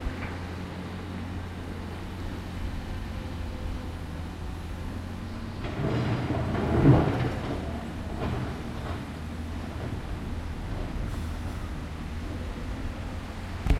Sounds from the construction site.